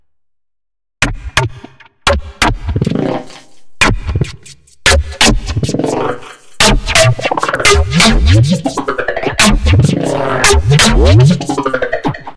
Piped squiggle
pipe sound with env and glitch sounds
edm, serum, synth